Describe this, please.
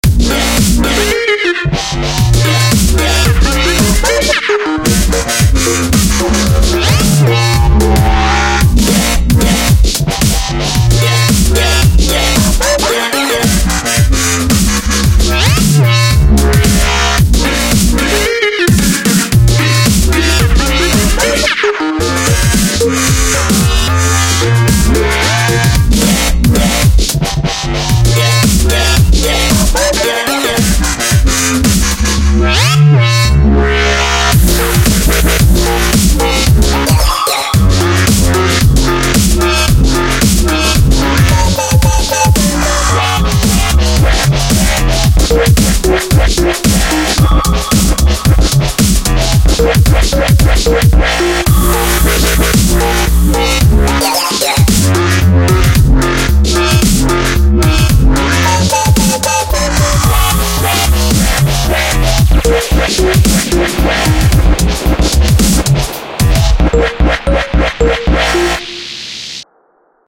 Capital Breaker Template 01
This is a clip of the first process of my new track, for anybody to use.
mastering, layering, drum, compression, limiting, synth, kick, snare, hat, EQ, parametric, bass, perc